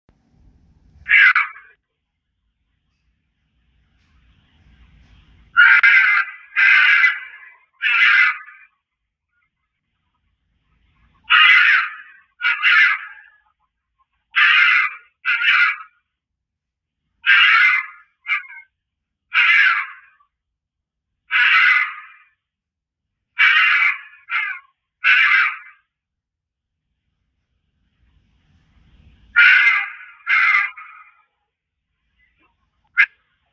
Fox Screams
The sound of a wild fox screaming in our neighborhood in the middle of the night. This could be used as a pained yell of some sort. This sound is a part of the Screaming/Vocal Horror pack.